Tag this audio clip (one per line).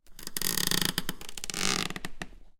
floorboard
creak
wooden